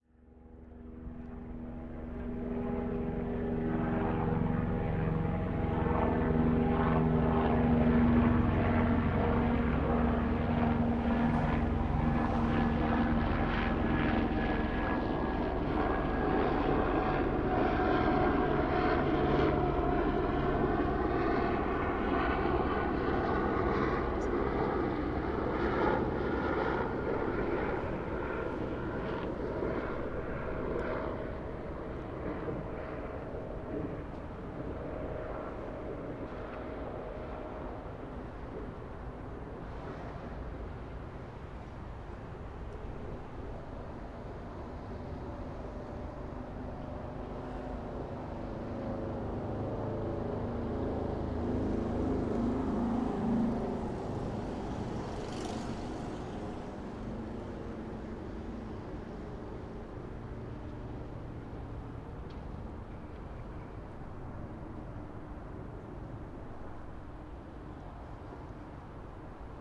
Helicopter Distant Los Angeles River
los-angeles, helicopter